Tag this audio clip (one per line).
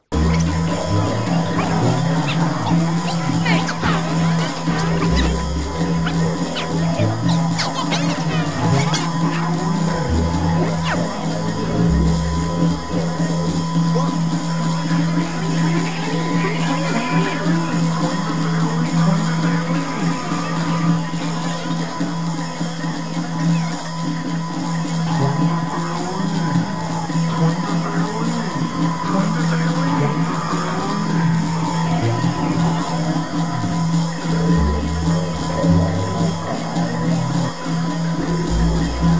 appreciate
Klingons
strong
women